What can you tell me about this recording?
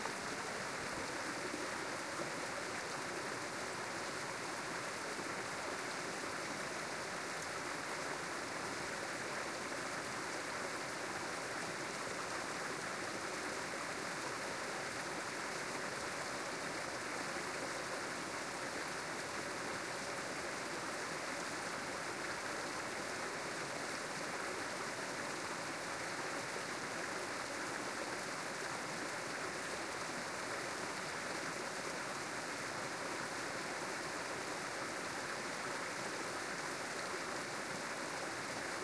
stream at a closer perspective, recorded with two AKG 480 omni with a jecklin disk.